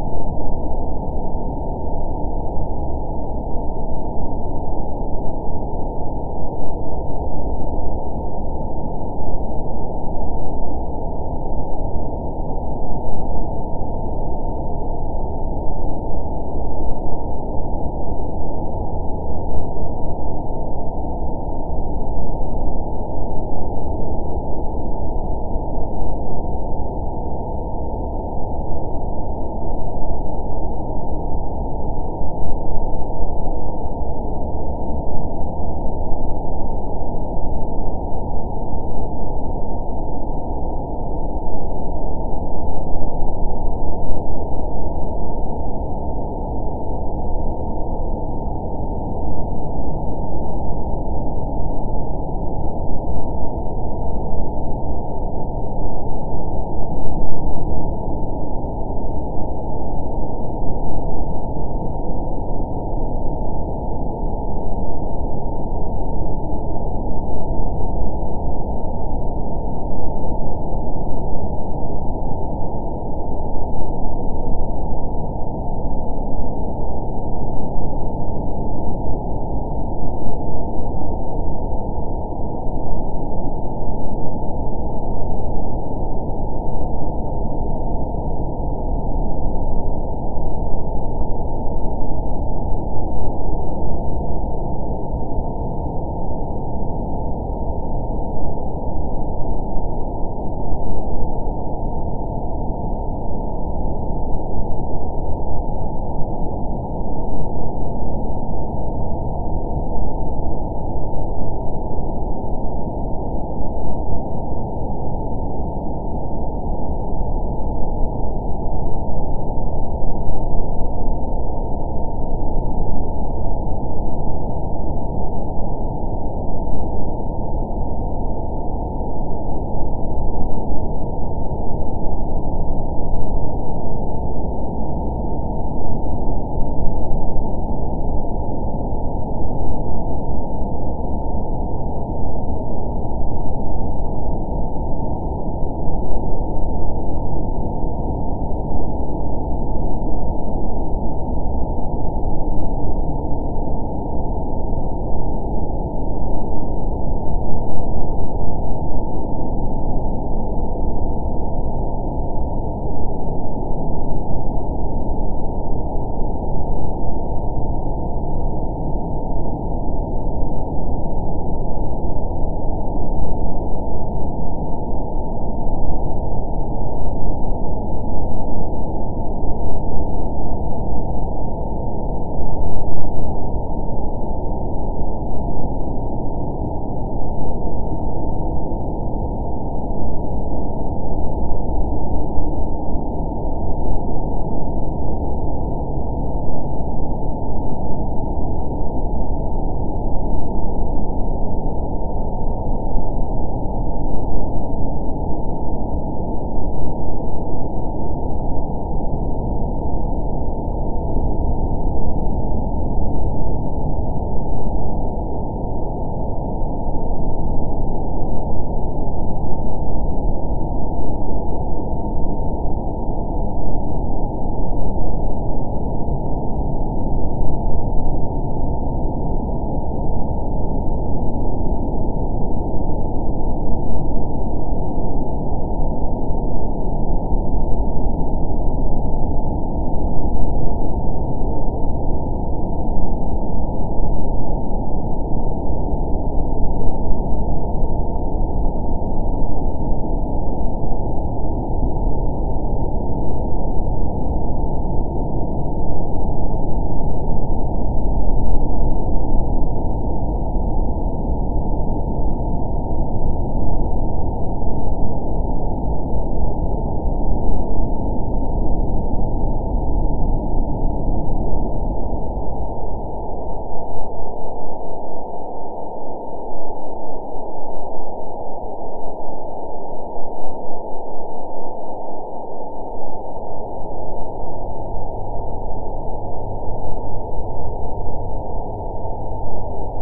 space wind(long)
Starting with a small amplitude sine wave of less than 1 db and 240 hertz. Wave was stretched by increasing tempo while
making specific changes in equalization(bands). The
wind sound was created using the GVerb plug-in before the
wave train was stretched.
wind, spaceship, white-noise, drone, ambient